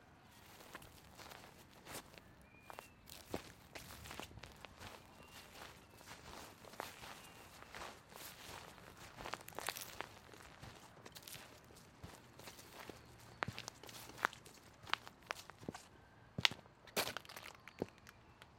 footsteps on dry grass with light birds
footsteps on dry grass then onto gravel at the end
recorded with a SD 702
dry-grass, footstep